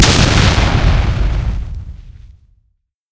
My First Recorded Explosion
A firecracker explodes, compressed and edited in Audacity